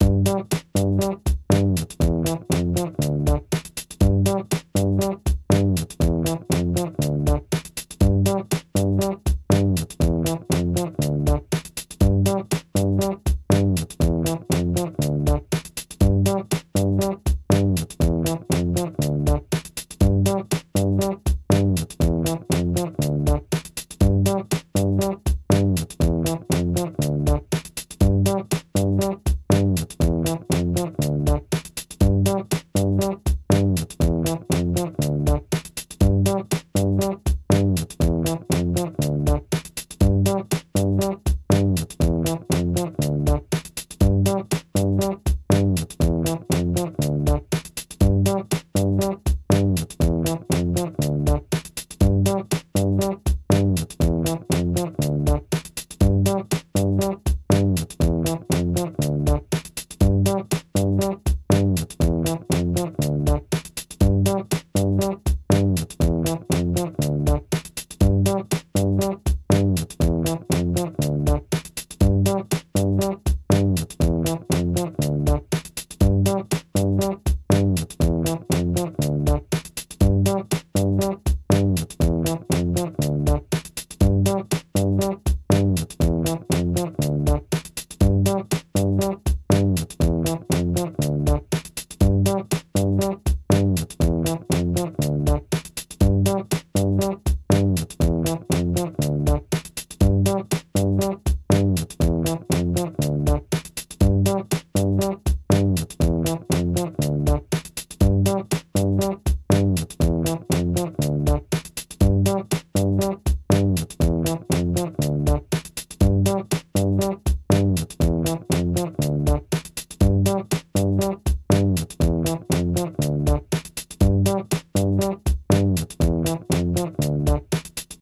Bass loops 085 with drums long loop 120 bpm
beat, bpm, drum-loop, hip, 120, 120bpm, groove, bass, dance, groovy, loop, loops, rhythm, percs, funky, onlybass, drum, drums, hop